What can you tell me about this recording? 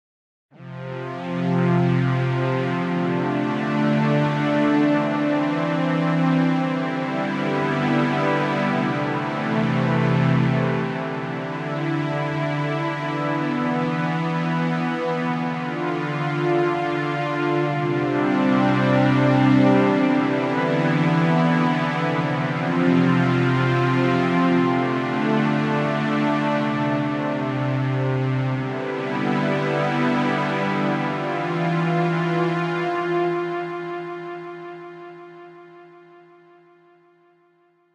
Ambient Chords 5
synth, space, texture, chords, ambient, synthesizer, pad